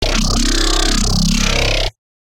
Hello,
This is the sample pack that I completed for the Sound Design course at Slam Academy.
I used Ableton’s Operator and Analog synthesizers and Xfer’s Serum plugin to create this sounds.
All processing was used using Ableton’s stock effects and Serum’s stock effects.
I hope you enjoy my sounds :)
- MilesPerHour
Ableton, Alien, Analog, Electronic, Futuristic, Futuristic-Machines, Machines, Mechanical, Noise, Operator, Sci-fi, Serum, Space, Spacecraft, Take-off, UFO